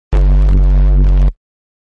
fatter bass
electronic synth made with Massive by Voodoom Production
electronic, nasty, synth